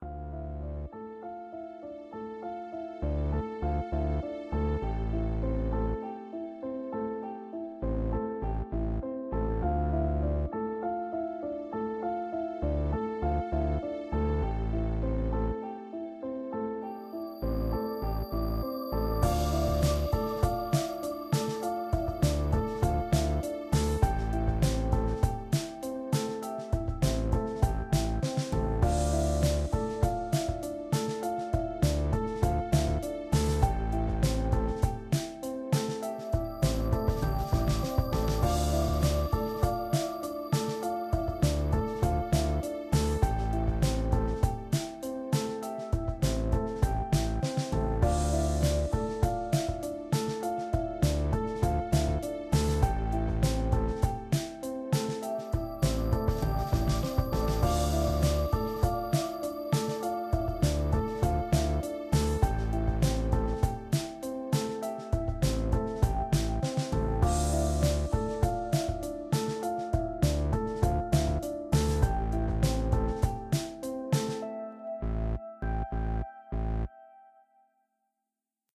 A mysterious beat for your mysterious projects!
thriller, mystery, Suspenseful, tense, adventure